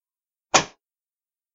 I dropped a book. Wow.
drop, book, fall